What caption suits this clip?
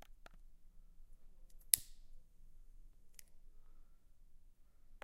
Sound recording in and around the house of K.
home, domestic, indoor, lighter